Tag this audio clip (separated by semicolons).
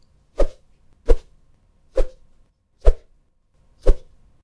fast; fight; Punch; swoosh